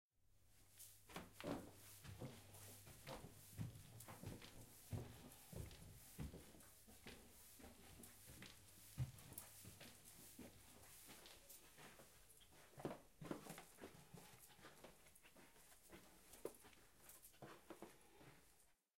Ascending Staircase Interior Carpet
Recorded with a Zoom H4N in a small carpeted hallway. Small house.
ascending, interior, carpeted, staircase, stairs